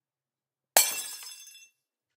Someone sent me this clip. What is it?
Glass breaking
An empty glass mayonnaise jar being dropped, and breaking. It immediately shattered into a billion peaces (no shards, it basically disintegrated) , giving this sound effect a clean and crisp break.
break, breaking, glass, OWI, sfx, shatter, shattering, sound-effect